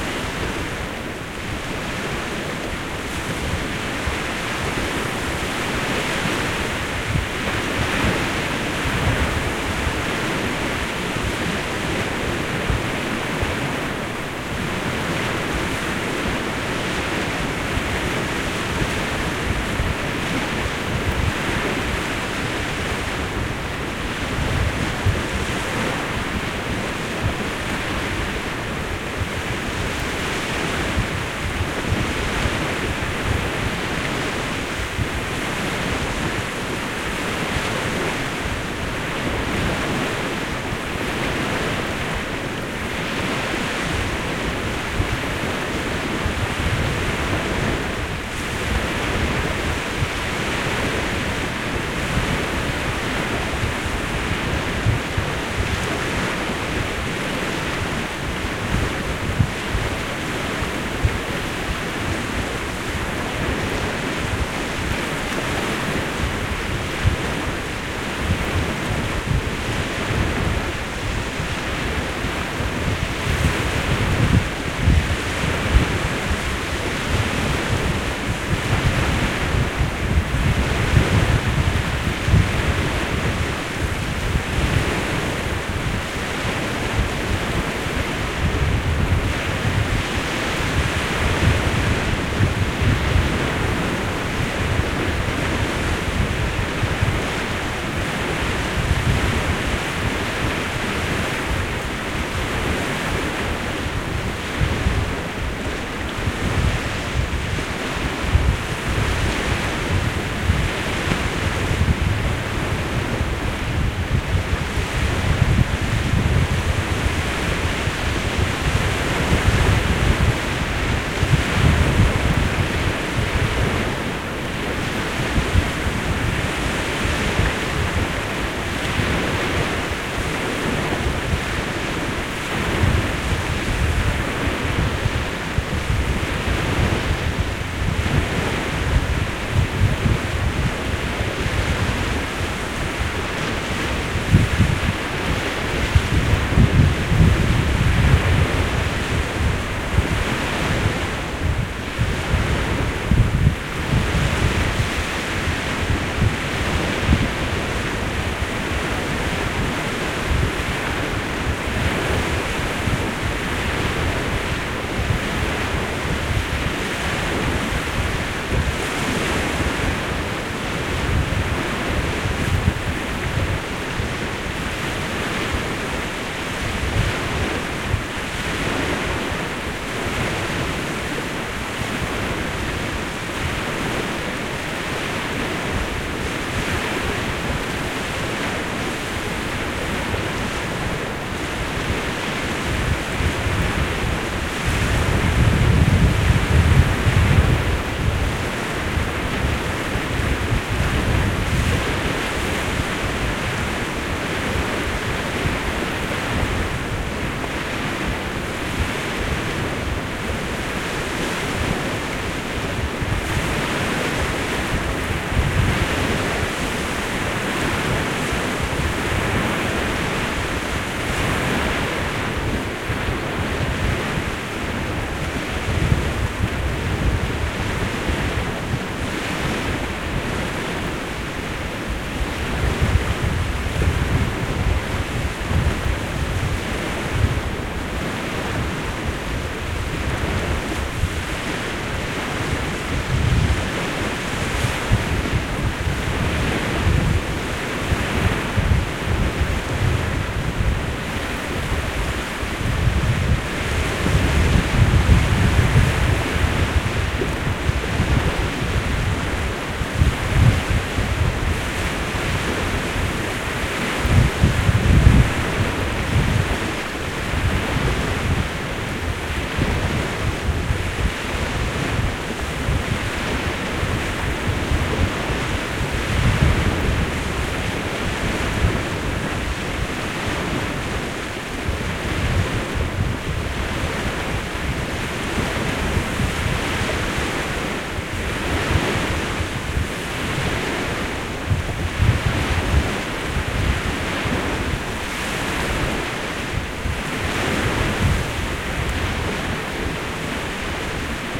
Waves and Wind
It was just a little bit too windy for the windshield I was using, when this recording was done with the beyerdynamic MCE 72 microphone and the Sony DAT recorder TCD-D7 in May 2006 at the Ho Bugt. This recording is far from outstanding, but that's what it sounds like near Marbaek / Denmark on a windy day.